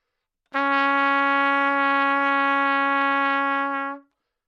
Trumpet - Csharp4
Part of the Good-sounds dataset of monophonic instrumental sounds.
instrument::trumpet
note::Csharp
octave::4
midi note::49
good-sounds-id::2830